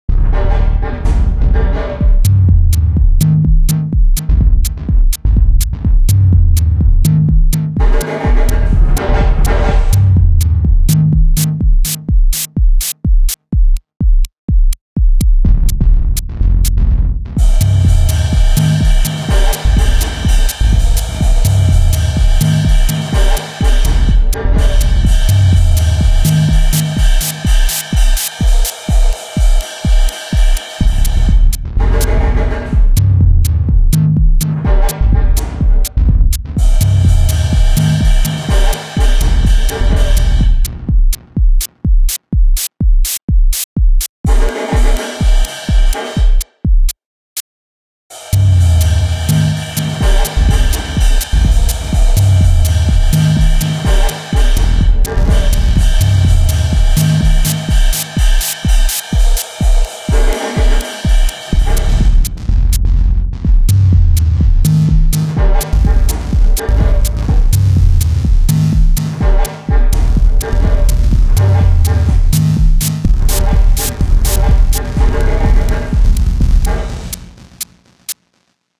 Minimal Techno with Real Drums
Some minimal techno with some nice roomy drumbreaks and drumhits I recorded myself.